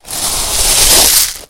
S016 Brake Gravel Mono
Rally car braking on a gravel stoned surface